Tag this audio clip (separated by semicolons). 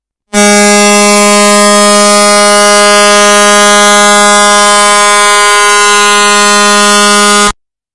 annoying; broken; error; glitch; Interference; loud; noise; overwhelming; painfully-loud; showmethemoney; too-loud